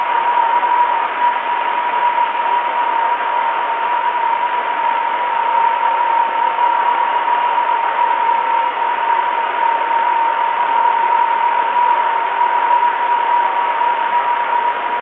radio noise 3
Noise recorded in 80-meter band.
radio, icom, ic-r20, noise, 80m-band